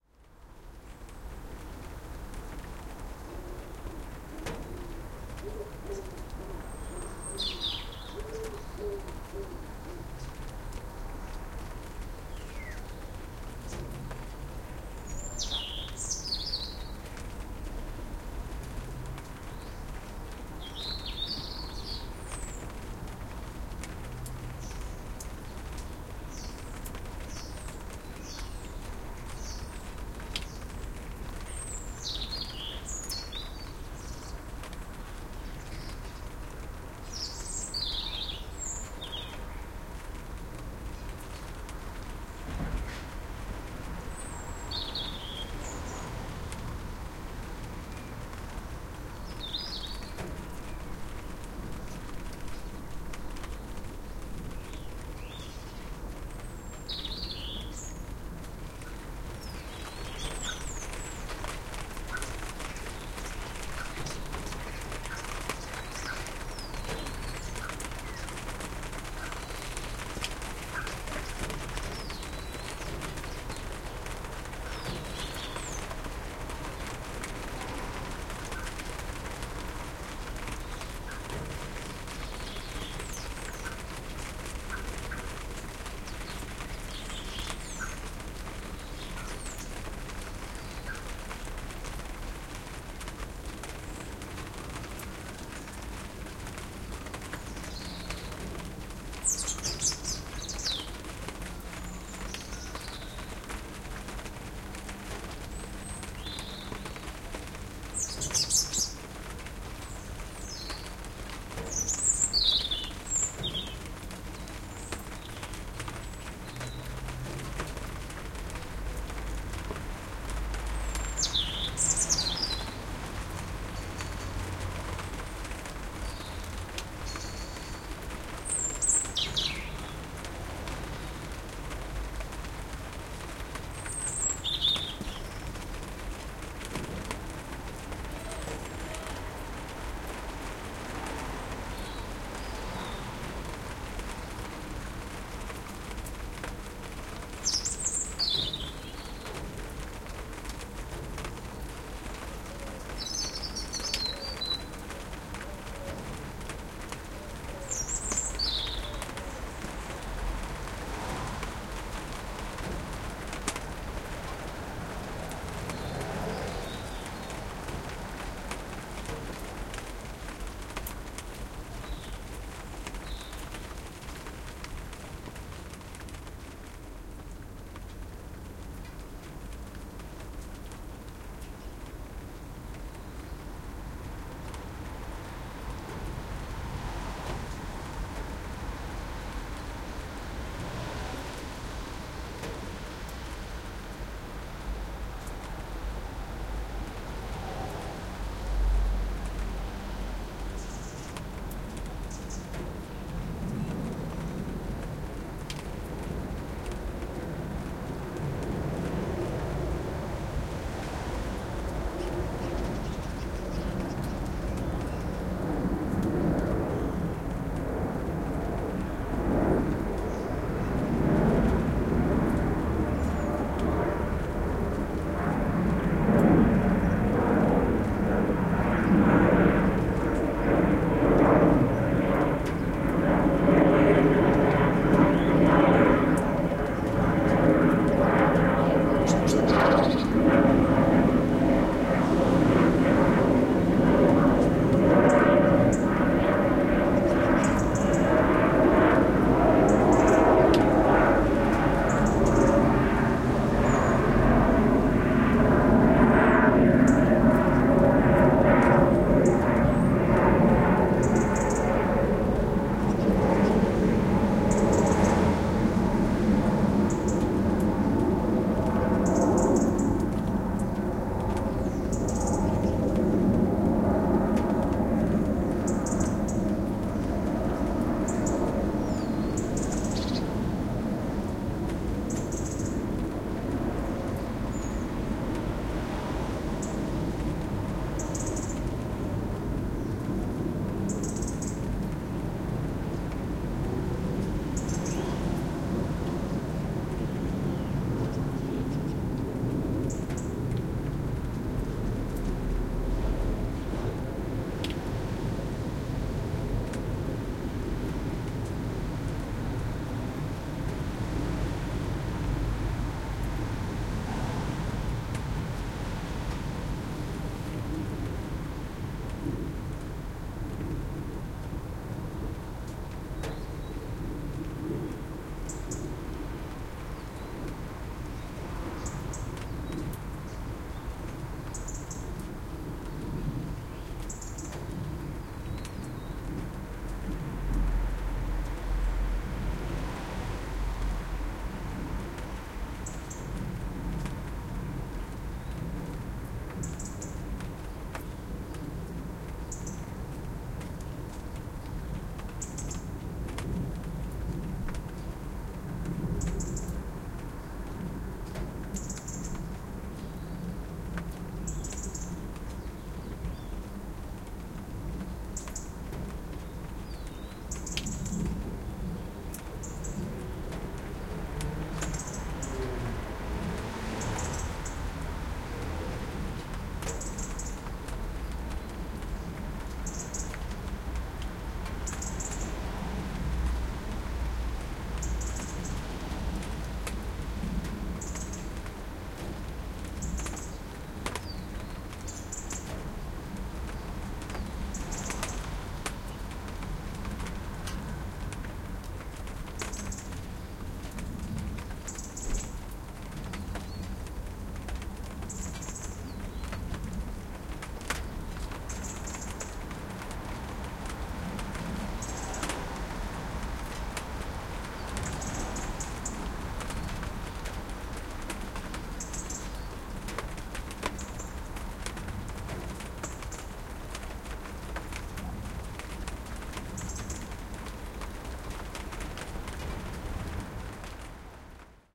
City garden in the rain
Sunday morning in the garden. The rain comes and goes, you can hear different birds, traffic passing and a plane flies over.
Olympus LS-5, internal mics, deadcat
aeroplane, ambience, birds, city, field-recording, garden, plane, rain, traffic